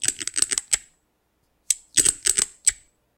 Recordings of the Alexander Wang luxury handbag called the Rocco. Bottom studs